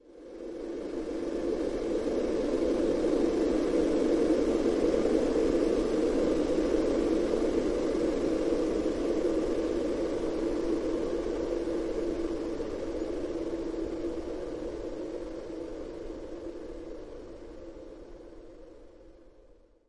Gust of Wind 4
Processed wind noise.
I slowed it down in Audacity.
60009, air, ambience, ambient, blow, gust, nature, wind